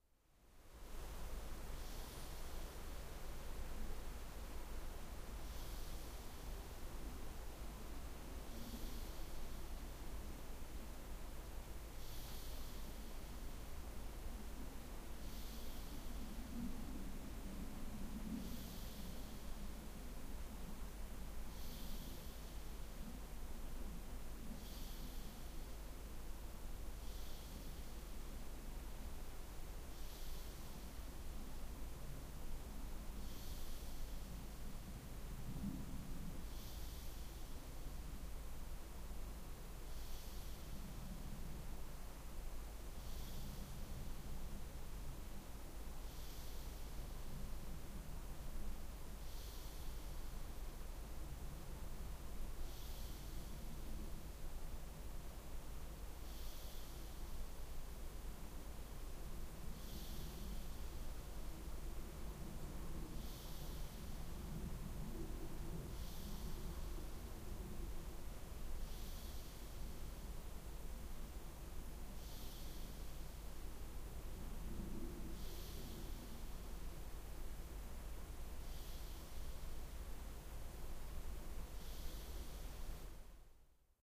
8.00 hoog vliegtuig
High in the sky an airplane flies by above the cottage in the woods of "de Veluwe" in the Netherlands where I am sleeping what you can hear as well. The wind in the trees can also be heard. I switched on my Edirol-R09 when I went to bed.
breath, airplane, bed, body, traffic, field-recording, nature, engine, human